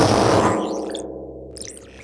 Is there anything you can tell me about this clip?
Some of the glitch / ambient sounds that I've created.
glitch, electronic, idm, reaktor